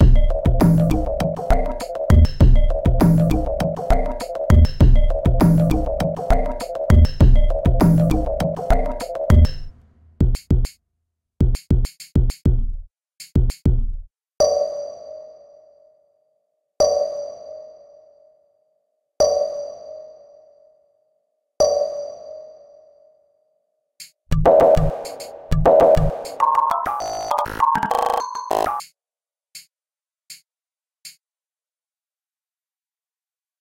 This is just what i think elavator music is. I have only heard elavator music in films, so if you have any advice, please leave it in the comments or send me a message.